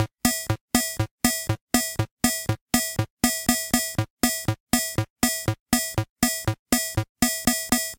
The electronic march rhythm from a MusiTech MK-3001 keyboard. Recorded through a Roland M-120 line-mixer.